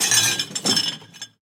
22c. rattling cups
the rattling of coffee cups
bar, coffee, espresso, machine